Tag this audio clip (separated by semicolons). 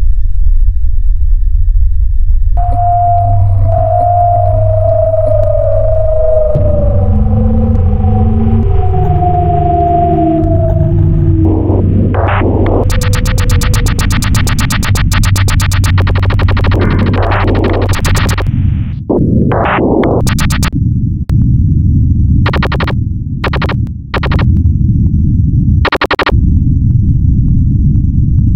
howitzer; war; machine-gun; projectile; military